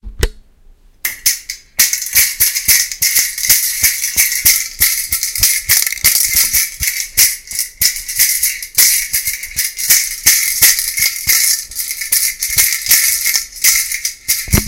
Sonicsnaps LBFR Serhat
france, labinquenais